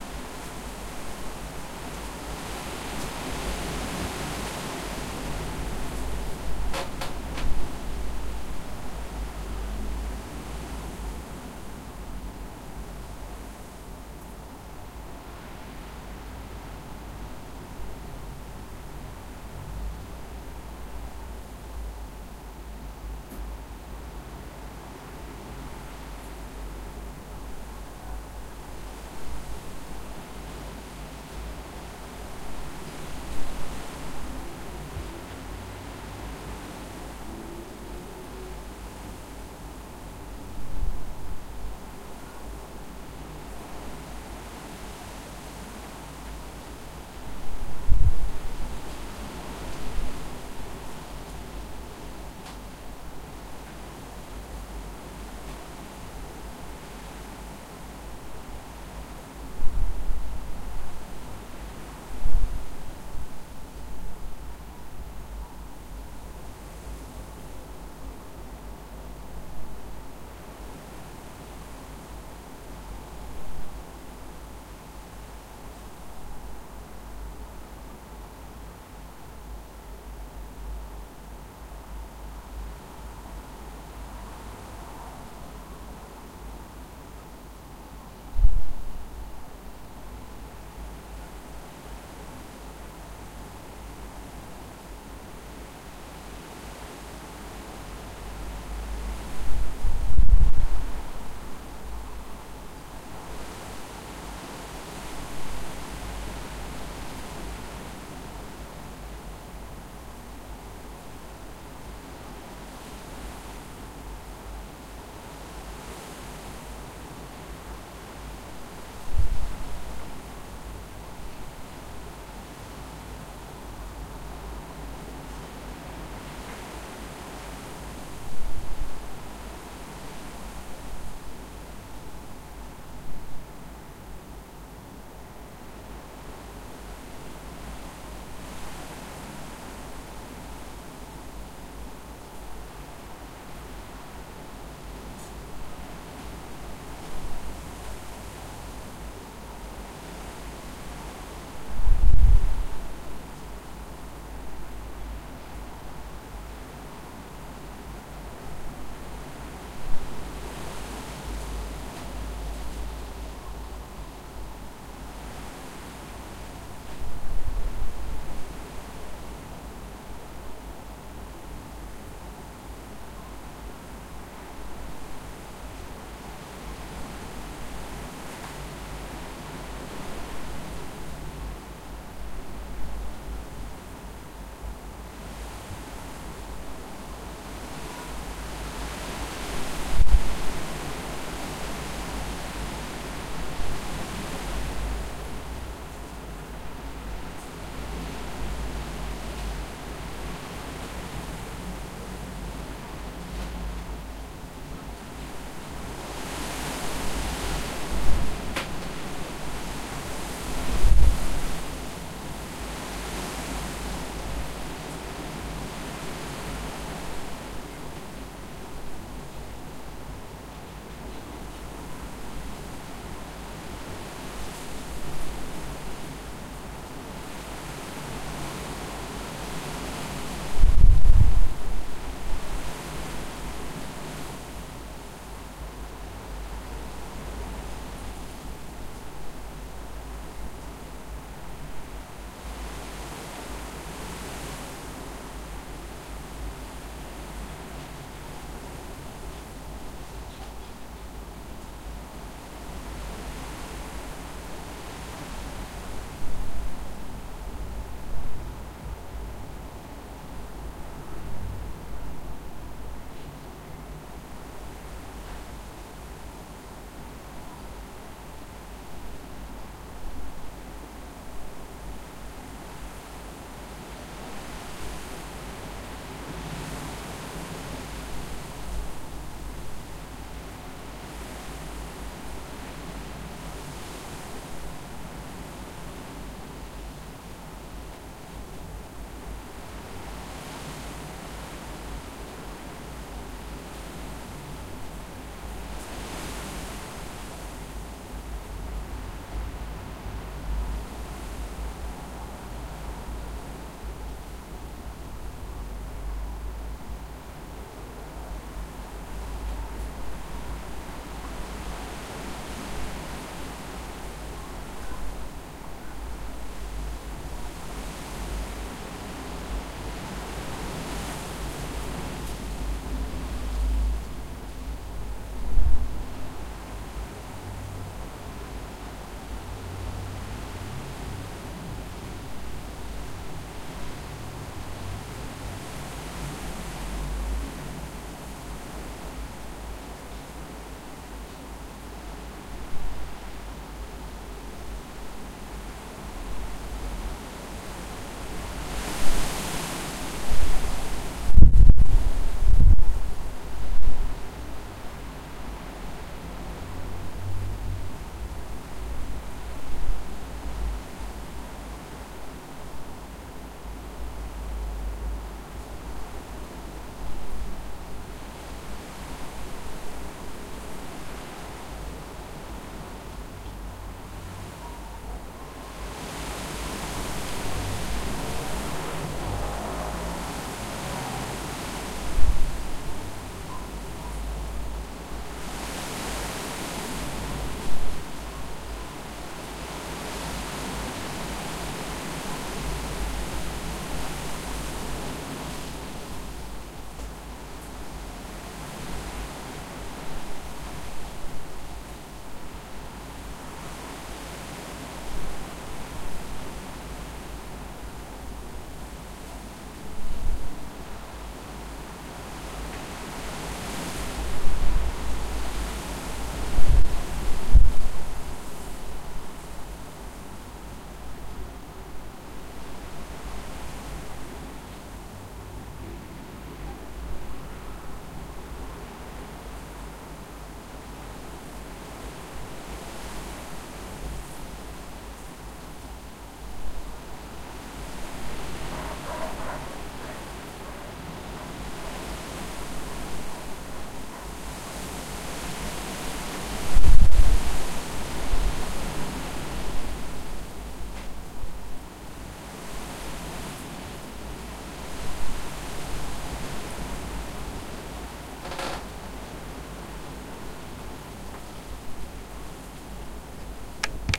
THe wind moves the leaves of some trees on a hill. The recorder is placed inside the little house with thw windows and door open.